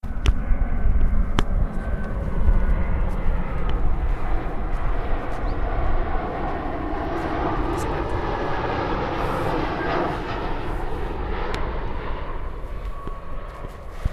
Avió Karima i Loli
Is the sound of an airplane in Delta of Llobregat. Recorded with a Zoom H1 recorder.